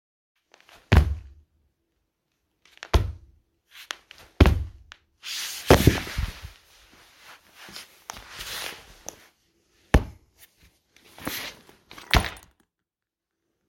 Breaking a door or dropping books
Drooping books on the floor for impact sound effects.
dropping, a, books, hitting, Breaking, door, drop